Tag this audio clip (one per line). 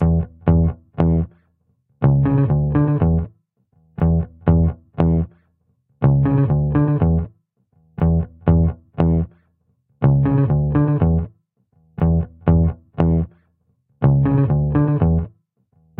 hop
groove
dance
hip
drum-loop
loop
groovy
bass
120bpm
funky
rhythm
drums
percs
beat
bpm
onlybass
120
drum